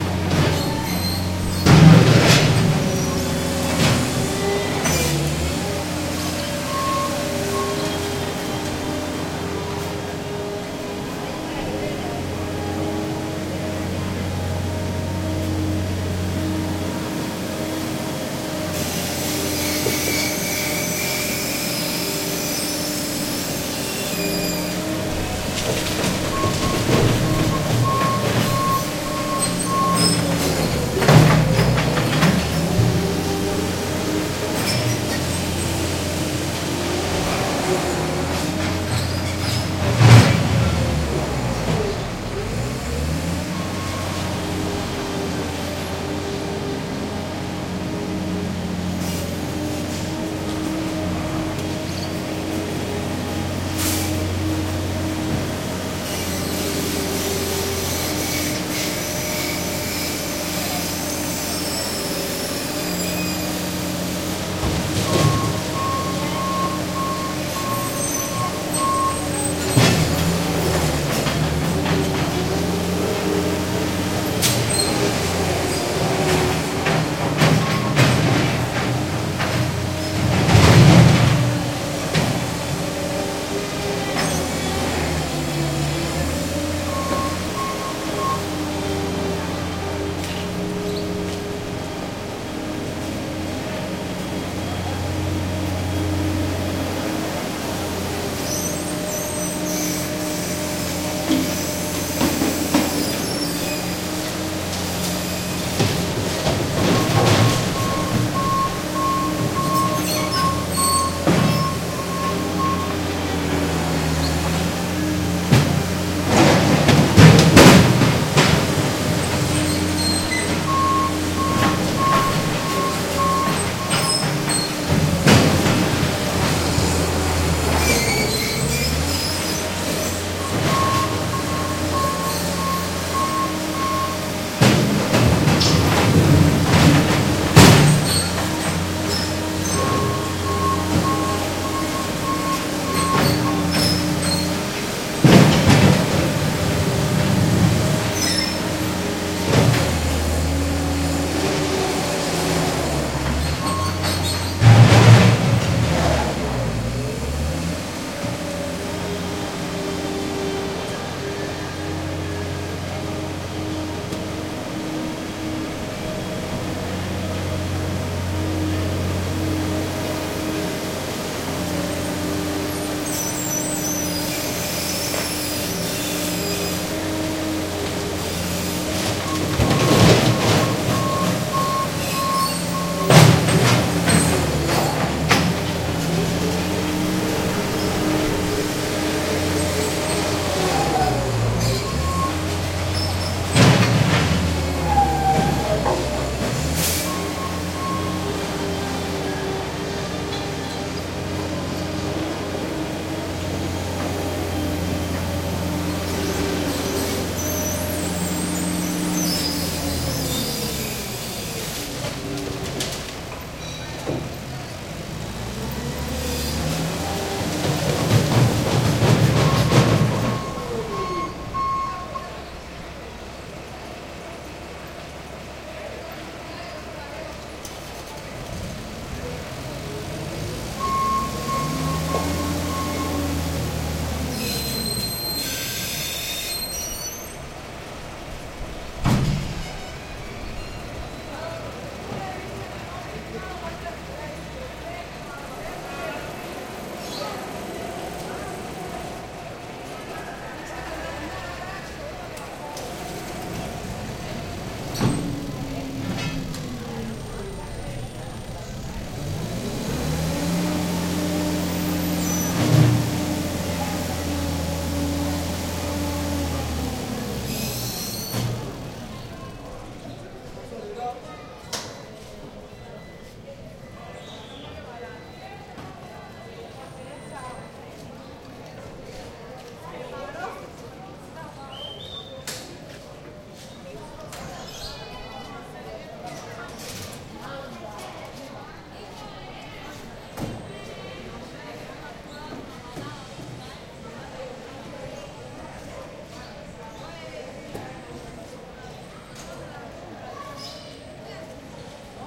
construction mini backhoe alley cuba